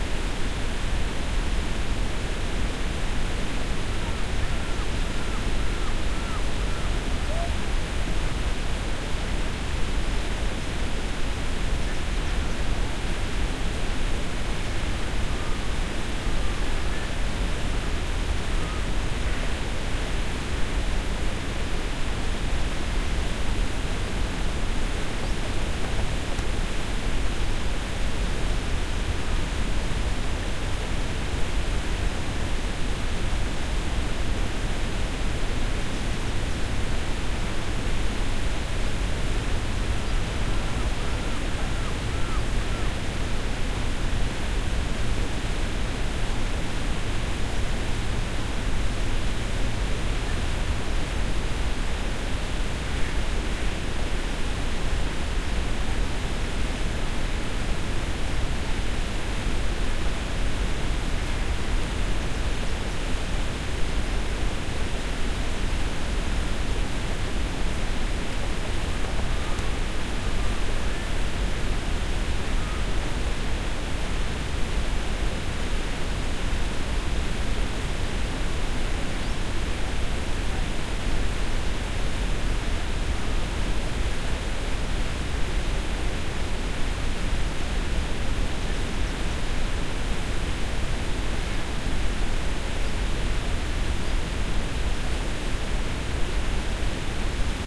large waterfall park
This is a waterfall soundscape resembling a large waterfall next to a large public park such as Niagara Falls.
It contains some synthesized sounds along with several recorded sounds from this site (unfortunately I did not keep track of which, sorry!)